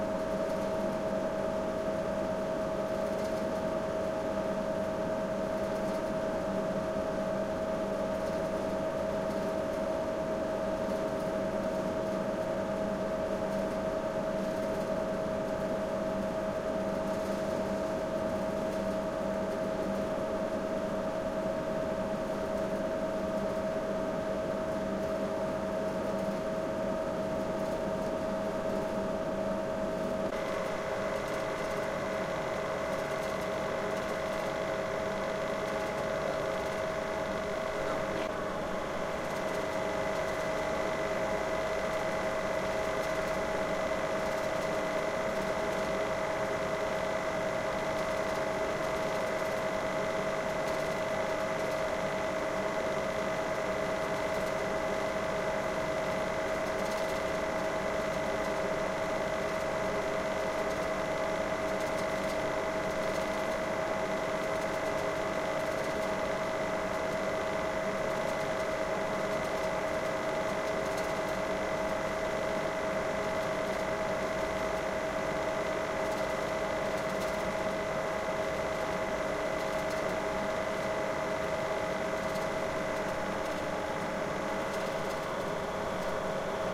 INT TECHNICAL ROOM MECHANICAL SPIN 2
Recorded at -2 floor of hospital. Room with big building warming mashines.
mechanical, int, room, spin, mashines, technical